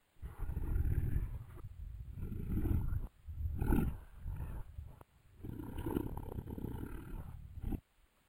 it sounds something like a lion roaring, but it is me purring.